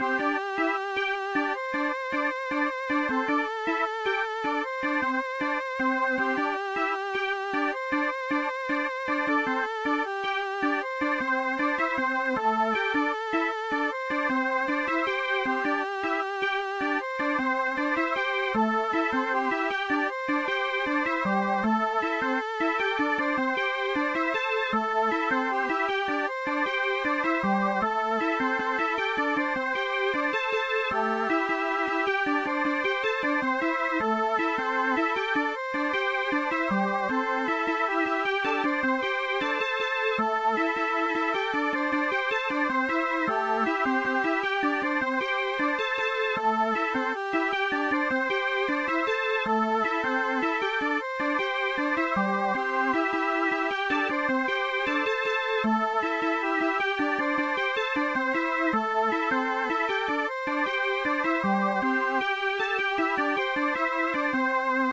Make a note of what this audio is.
Pixel Cyber Forest Melody Loop version 01
Adventure in pixel cyber forest or anything related.
Thank you for the effort.
2d, adventure, chiptune, loop, loopable, melody, music, Netherlands, pixel